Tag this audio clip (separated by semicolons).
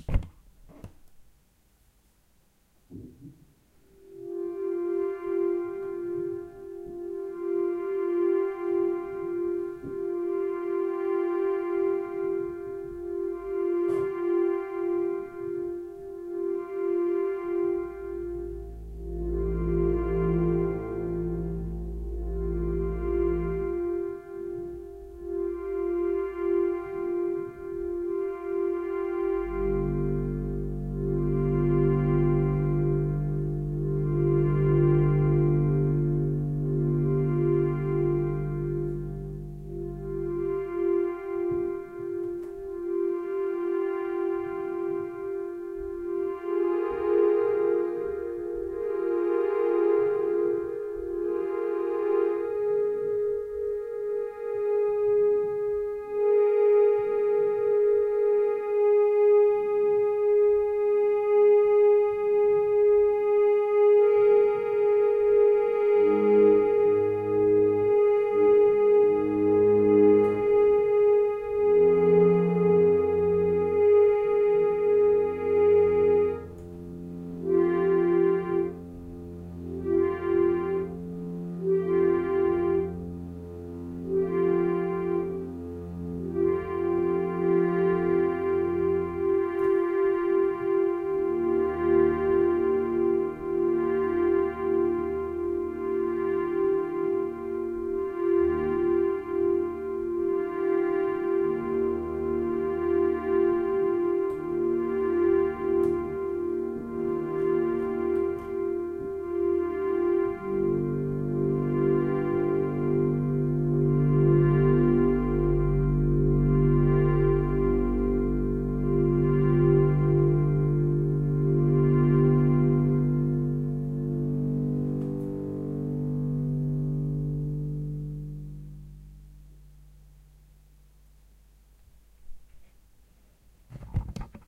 wave; ambience; meditation; pump